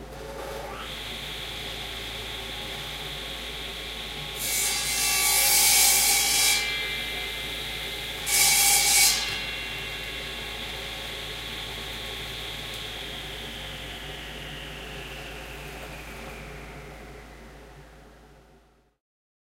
A large table saw in the wood shop at NYU's ITP. Turn on, cut, turn off. Barely processed.
machine, saw, wood